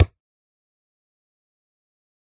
Ball Single Bounce Concrete #1
Soccer Ball Single Bounce on Concrete Floor #1 Plus 2 Seconds of Silence